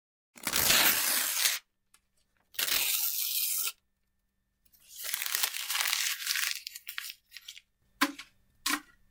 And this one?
tearing and discarding paper
the recording of a tearing paper, turning it into a paper ball and discarding it in a plastic bucket.
recorded with a SF-666 condenser microphone
edited with adobe audition 2018
discarding, paper, ripping, trash